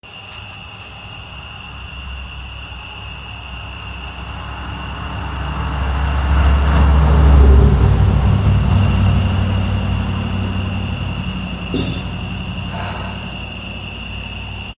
I got into a small crawl space under a concrete road, which seemed to be an old sewage tunnel, and recorded the sound of a car driving overtop of me. It's a gentle rise and fall of a car engine. You can hear me let out my breath as the car drives away.